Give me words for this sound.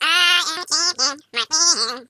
minion taunt 2
A taunting voice that sings "I am the Champion, my friend!" but hardly understandable. Too sweet!
fun, voice